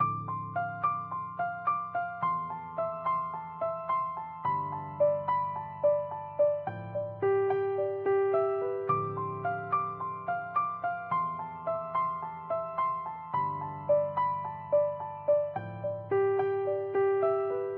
ableton piano sampler
ableton, piano, sampler